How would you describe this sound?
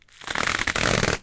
rustle.bub-Rip 3
recordings of various rustling sounds with a stereo Audio Technica 853A
bubble, rip, rustle, bublerap, scratch